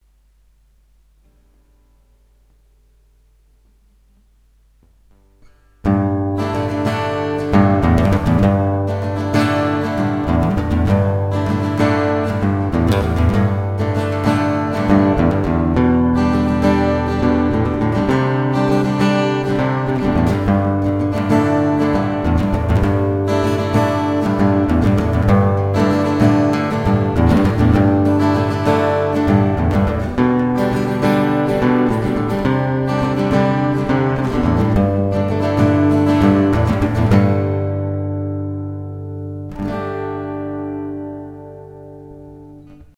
Acoustic guitar music.
experimental, instrumental, music